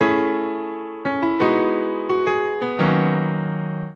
The first in a series of three piano riffs that are meant to be used together. This is a set of three chords with a little bit of jamming on the right hand.
DSK Acoustic Keys VST and sequenced in Madtracker 2, with no other effects. I would add a slight delay to the loop and a good bit of reverb to thicken the sound, plus some synth strings to underpin the chords.
This is from a drum and bass track I haven't finished yet at 172.50 BPM.